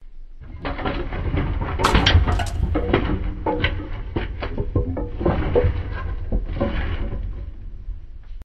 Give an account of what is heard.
Simulated wood crash
Combination of my various sounds to simulate the sound of wood colliding, such as Old ships etc.